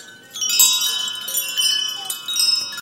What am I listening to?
recording of wind chimes
chime, chiming, Minnesota